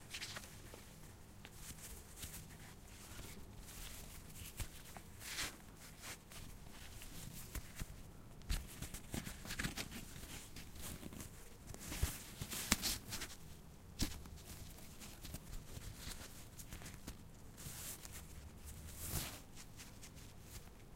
Tying shoelaces: a close-up listen to a very subtle sound when two pieces of string is being tied around each other. You can hear the fumbling of hands swooshing the string around each other and a harder sound of when the knot is being pulled so that it doesn't come loose. Can be used for dramatics and overexaggerating. Recorded with the Zoom H6, Rode NTG.